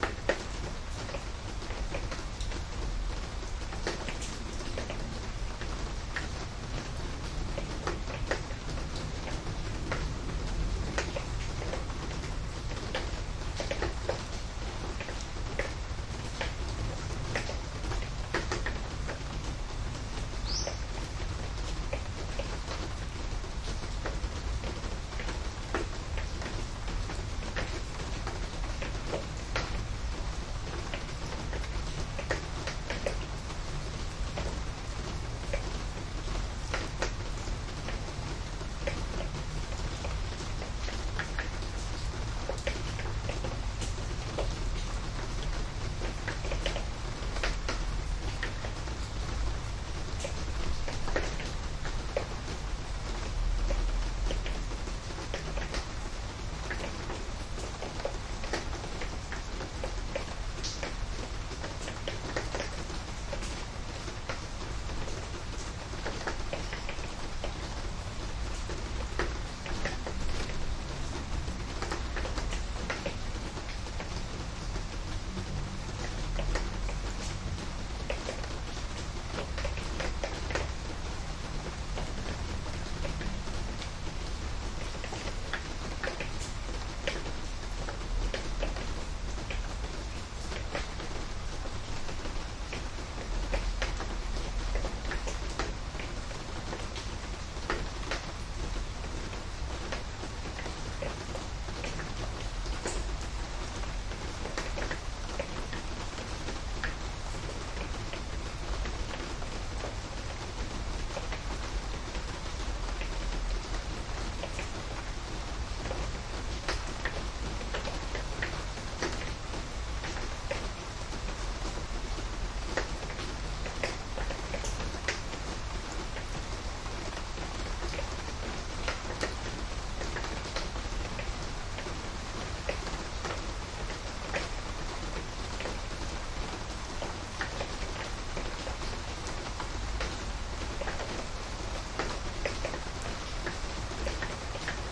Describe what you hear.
Rain in Bangkok - Windows Open 1
rain, raindrops, raining